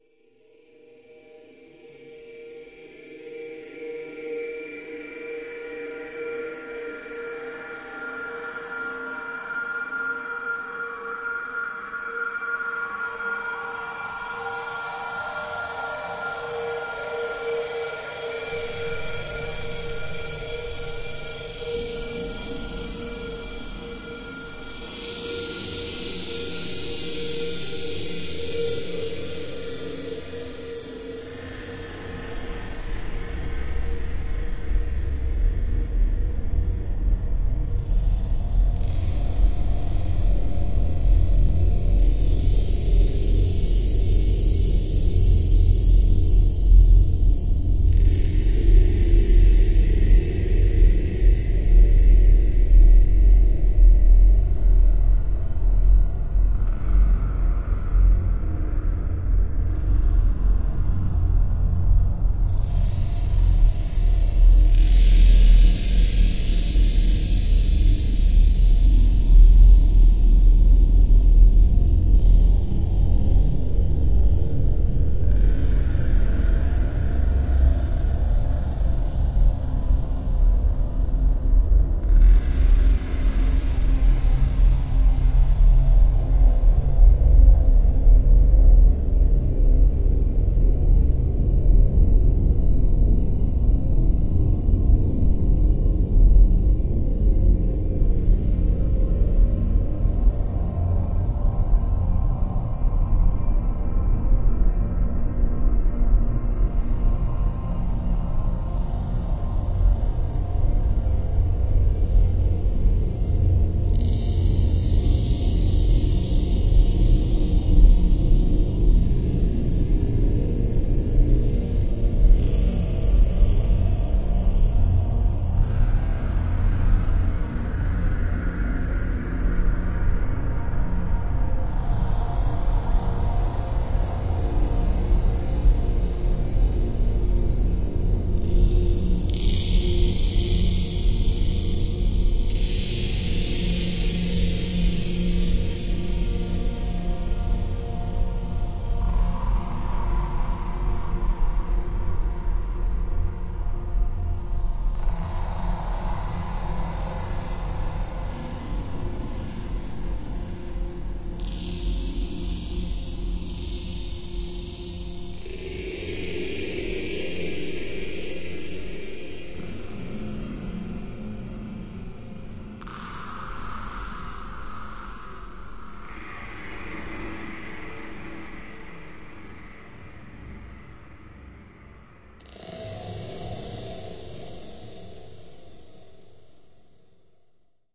Quite long morph-like sound.
morph, drone